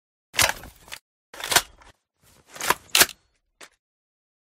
g36c reload sound
the reload sound of my favourite gun :)
G36, G36c, reload